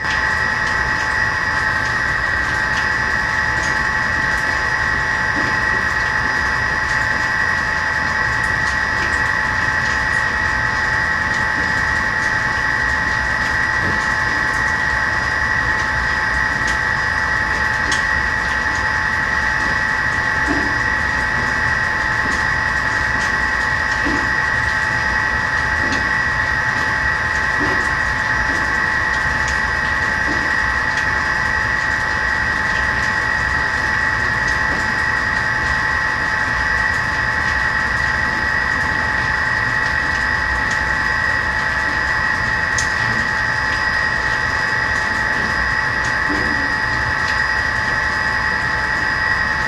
subway metro escalator railing rubber mechanical hum close1
escalator, hum, mechanical, metro, railing, rubber, subway